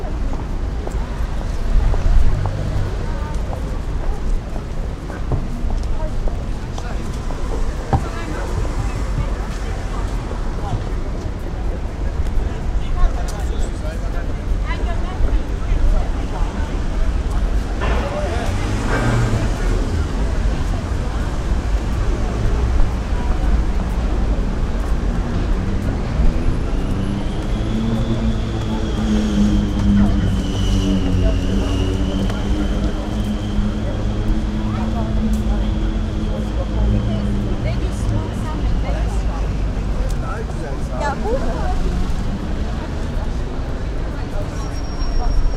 London - Whitechapel market
This sound was recorded using a Marantz
the location was Whitechapel market whilst I was walking down the street
april 2014
cars, london, market, motorbike, people, street, talking, whitechapel